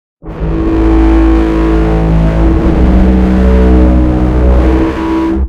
Hi! This is my first upload. This is a recording on a BBb Tuba of an A natural (below staff), a low B natural (also below), and an F natural (below again).
Edited for ominous-ness with EXPStudio AudioEditor and CyberLink WaveEditor. Mostly an experiment in those suites.
Sorry for low quality/wrong pitches!
not-good, stupid, scary, ominous, fog-horn, alien, war-of-the-worlds, tripod, monster, foghorn, horn, roar, mech, tri-pod, tuba, fail